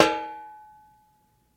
The sound of a metal folding chair's back being flicked with a finger.